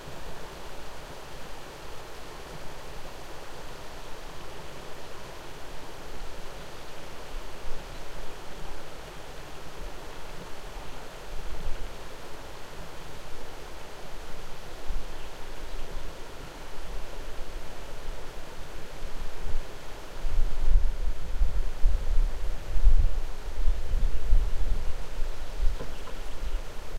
Recorded with Sony PCM-D50 in June 2014 on the cableway in the Carpathians, Ukraine.
ambiance, ambience, birds, Carpathians, field-recording, forest, Karpaty, mountain, nature, PCM-D50, ropeway, Ski-lift, sony, summer, Ukraine, water